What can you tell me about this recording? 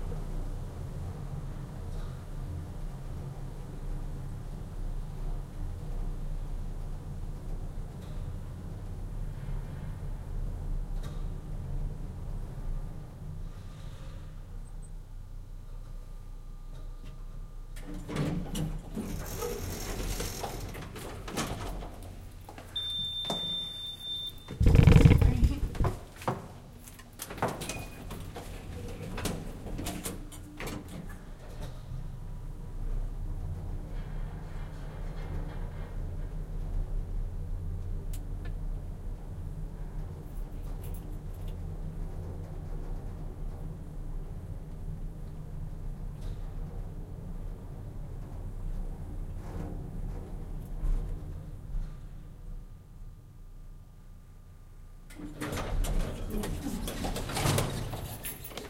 A recording of going down in an elevator at night.